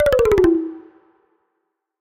Rainforest Flurry 2
Descending percussive melody.
Asset, Percussive, Tropical, Virtual-instrument